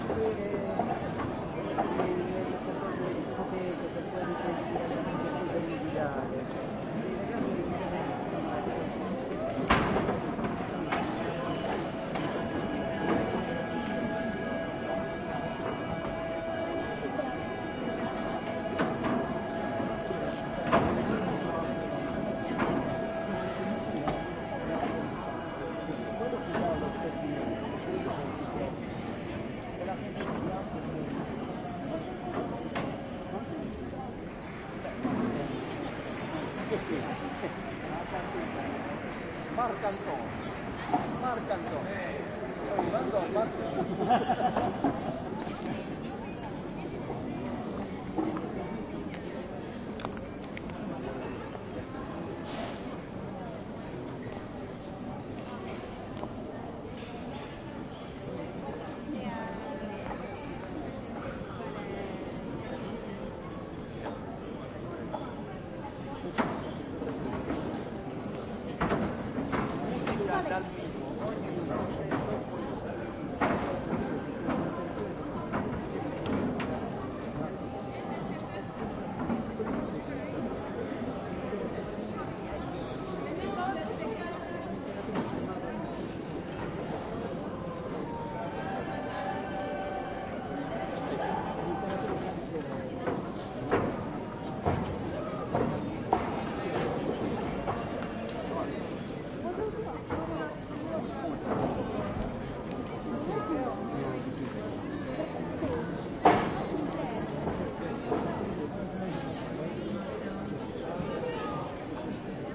130717 Split DiocletianPalacePlaza1 F 4824
Surround recording of the main square in Diocletian's Palace in the old center of Split in Croatia. It is a summer afternoon, flying swallows can be heard and the place is teeming with tourists from all nations sitting and drinking coffee or listening to a group of folk singers which can be heard in the distant background. Part of the square is covered with a large wooden porch, making quite a clatter as the tourists walk over it.
Recorded with a Zoom H2.
This file contains the front channels, recorded with a mic-dispersion of 90°
atmo, bustling, busy, clatter, croatia, crowd, field-recording, mediterranian, noisy, people, singing, split, summer, tourist, town